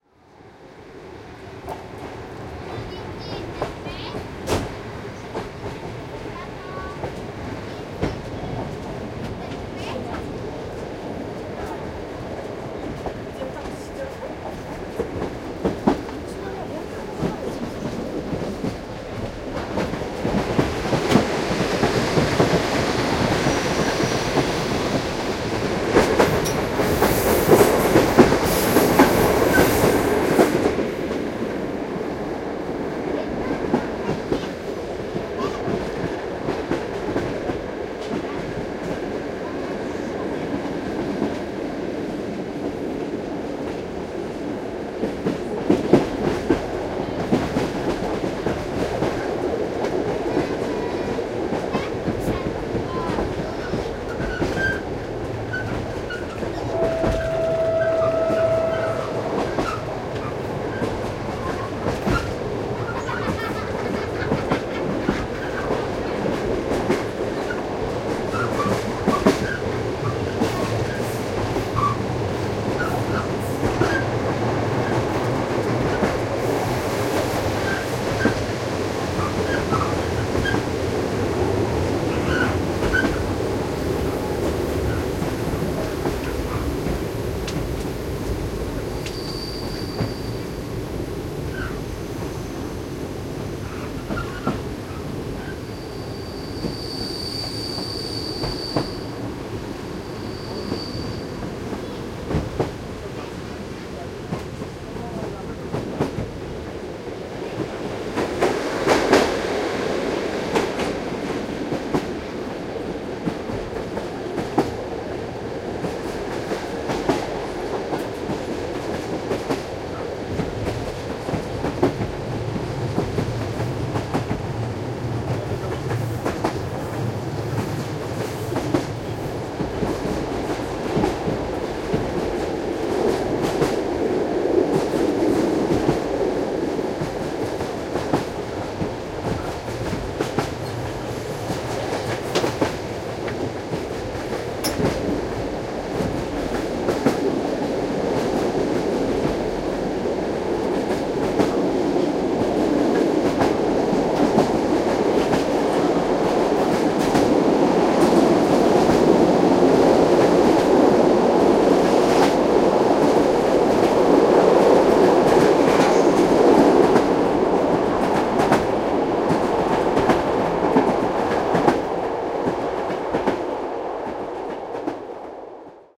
atmos trainjourney
recording of a train journey somewere between Katowice and Wroclaw, Poland. It's a binaural recording done in the middle of a train, standing next to a window.
fx, train, atmos, window